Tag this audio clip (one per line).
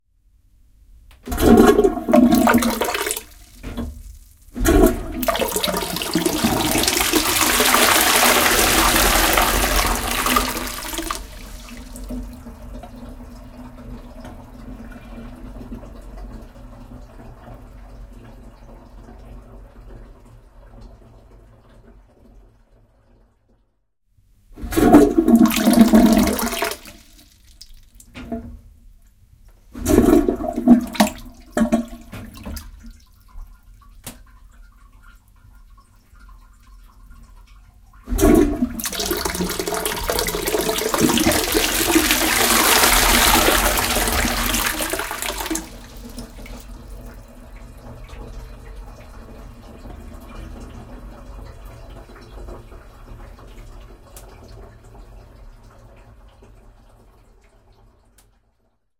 Bowl
Washroom
Shower
Weak
Spoilt
Toilet
Flush
Restroom
Flushing
Bathroom